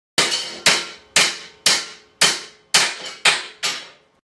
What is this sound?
Hammering Metal
Hammer hitting metal.
industrial, metal, noise, welding